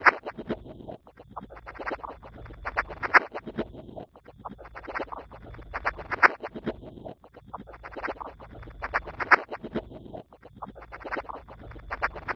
One in a small series of odd sounds created with some glitch effects and delays and filters. Once upon a time these were the sounds of a Rhodes but sadly those tones didn't make it. Some have some rhythmic elements and all should loop seamlessly.
ambient, experiment, glitch, sound-effect